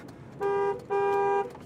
FX - claxon
car, claxon